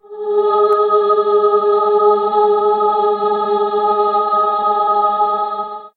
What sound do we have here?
synthchorus AH G# D# G#
choir, chorus, sing, singing, synth-chorus